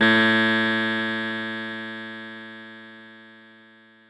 fdbck50xf49delay9ms
A 9 ms delay effect with strong feedback and applied to the sound of snapping ones fingers once.
delay, feedback, synthetic, cross